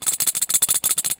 Light Metal Rattle Medium 2
Plastic, Friction, Boom, Impact, Smash, Crash, Steel, Metal, Tool, Hit, Bang, Tools